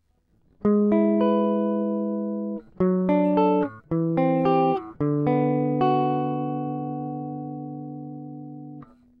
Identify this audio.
guitar arrpegio 1
This is some usefull guitar arpeggio what I was recorded on free time..